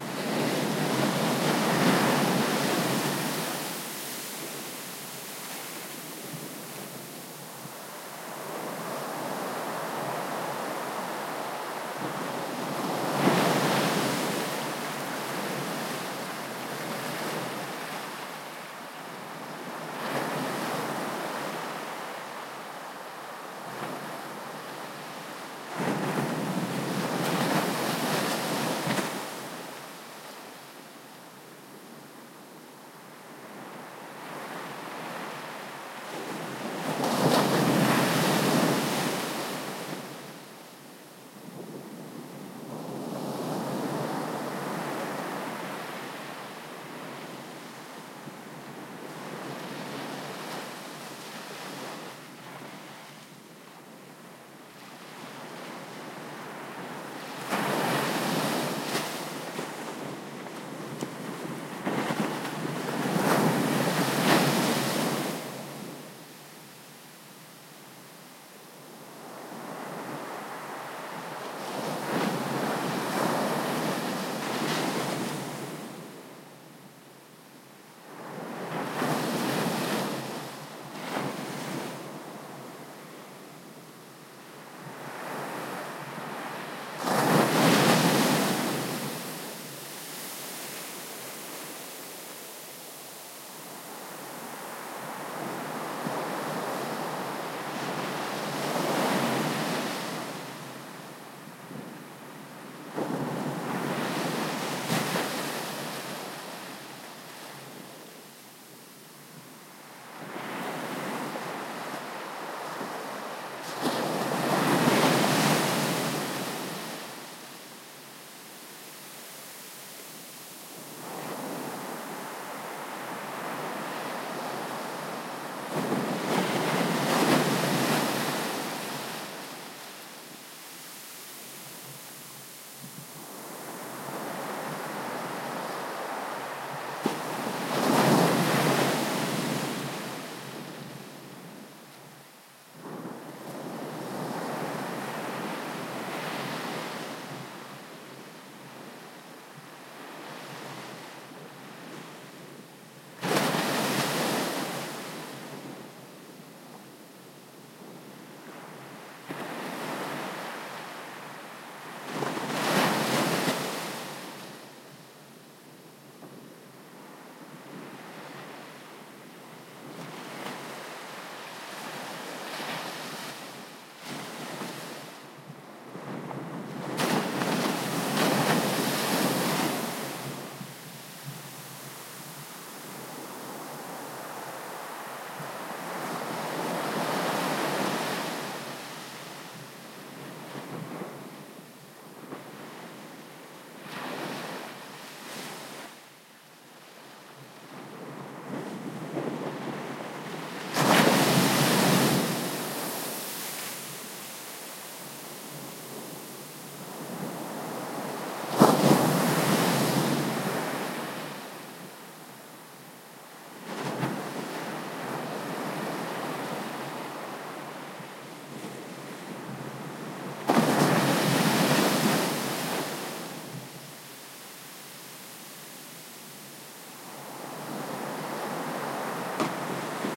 Atlantic waves at sandy beach , ondas do mar na praia, Wellen am Strand
Just waves at the beach.
waves, surf, seaside, wave, Sand, Stereo, shore, breaking-waves, coast, Strand, water, Wellen, ondas, Atlantik, Sandstrand, areia, atlantic, praia, sea, ocean, beach